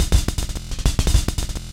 processed with a KP3.